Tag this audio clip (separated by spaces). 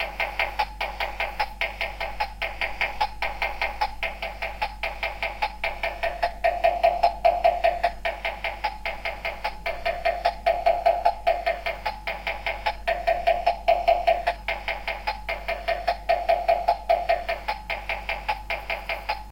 spatial bottle panning beatbox